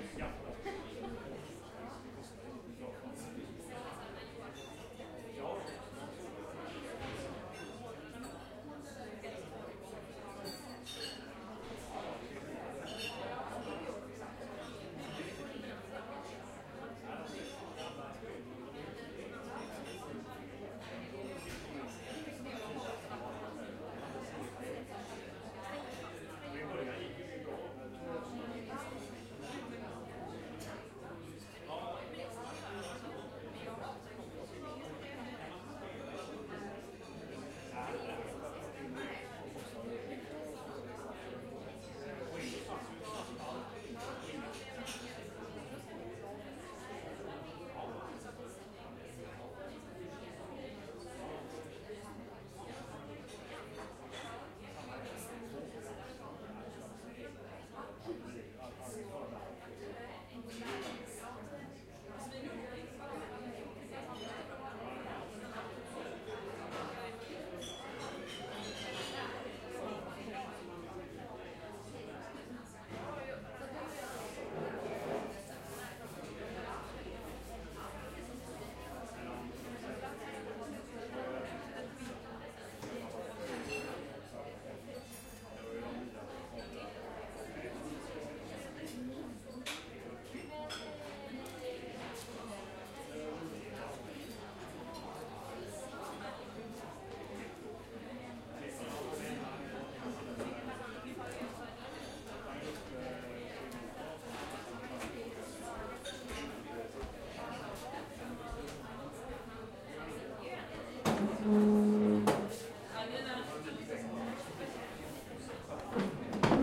Recorded at large cafe in Sweden with a Zoom H4n Pro. You can hear people, murmur, rattle etc (no music)